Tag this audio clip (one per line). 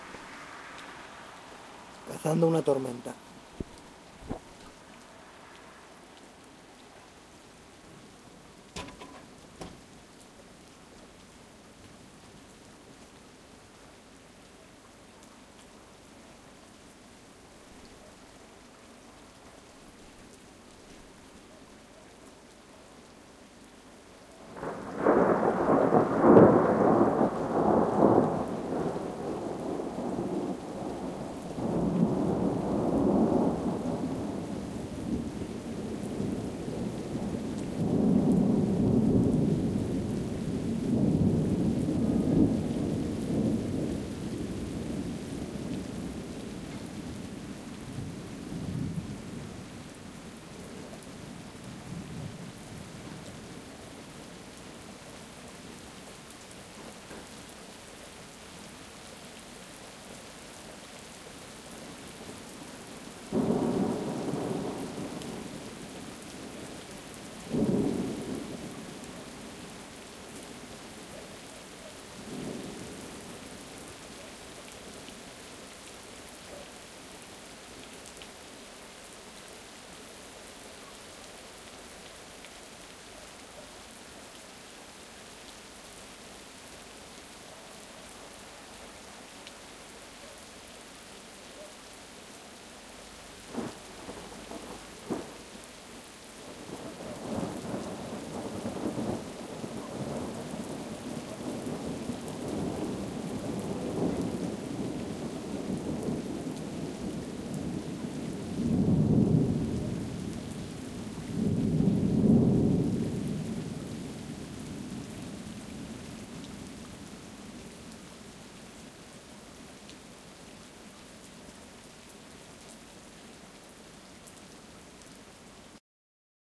ambience
bird
rain
storm
thunder
wind